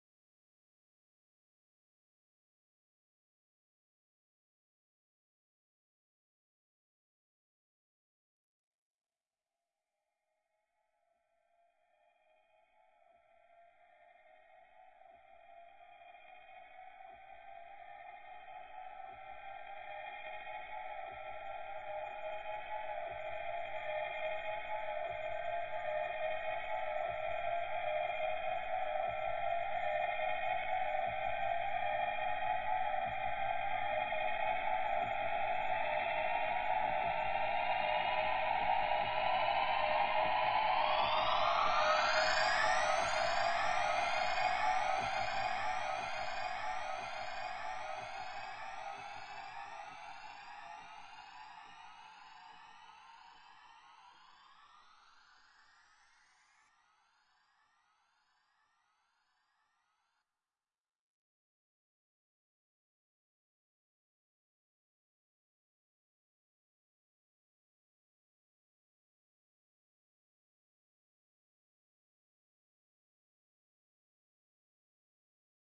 sample to the psychedelic and experimental music.